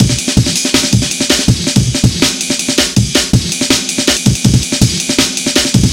Jungle Break
Breakbeat 162bpm. programed using Reason 3.0 and Cut using Recycle 2.1.